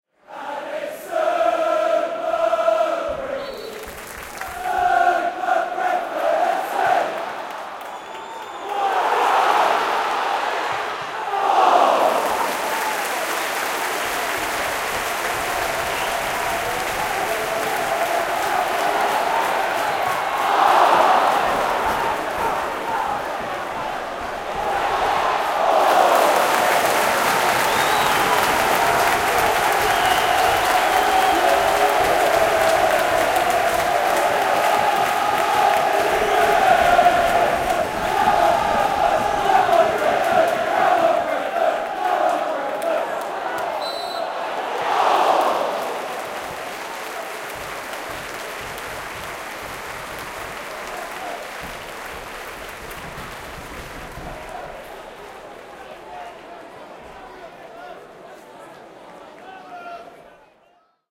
This is an extract of a sound recording I made at the Brentford vs Swansea match on 12th September 2006, which I used to open the play "There'll always be a Brentford" performed at the Questors Theatre, Ealing, London in November 2006.
The play commemorates 100 years of Brentford FC at its present home Griffin Park.
This extract happened around six minutes into the game when, briefly, Brentford were in control. They lost the game 2-0. The referee for the game was Graham Poll, who was still trying to repair his reputation after his handling of the Australia-vs-Croatia match in the World Cup in Germany.